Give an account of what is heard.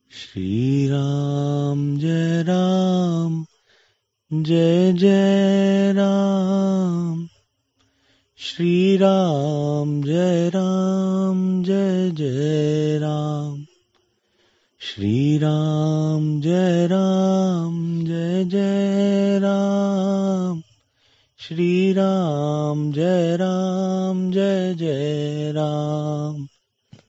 Shri Rama jai Rama jai jai Rama
Rama was an incarnation of Lord Vishnu who descended on the earth to annihilate demons and establish the path of Dharma. Ram is a very popular mantra chanted since ages by the Hindus with devotion, faith and surrender. Lord Hanuman is the epitome of devotion to Lord Sriram. He championed the chanting of Ram Nam constantly at all times. Chanting Ram Nam is the ultimate way to get all the desires fulfilled and move towards liberation or Moksha. Here is a collection of ram mantras and their benefits.
Thanku love you all
bhajhan, Hindu, Shri-Rama